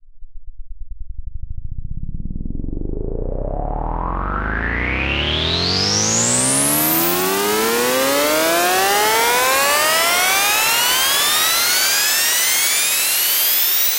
Riser Pitched 04
Riser made with Massive in Reaper. Eight bars long.
dance, edm, percussion, synth, techno, trance